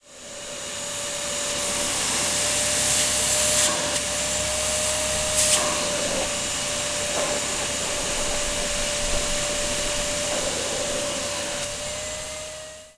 Sound of vacuum cleaner